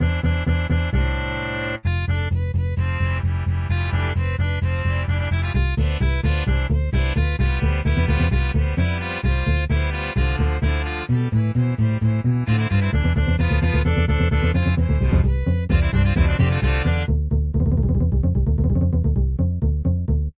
Loving Forrest
Song that is rather spastic. Strong start.
experiment, score, soundesign